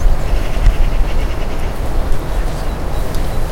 The singing of a magpie, delta of Llobregat. Recorded with a Zoom H1 recorder.
Deltasona, forest, nature, birdsong, field-recording, bird, morning, spring, el-prat, birds